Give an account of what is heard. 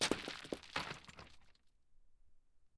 SFX Stone Calcit DeadSea Throw #4-184
glassy stones being thrown